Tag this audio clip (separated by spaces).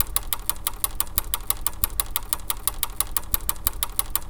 pedaling mechanic bicycle cycle bike wheel loop spokes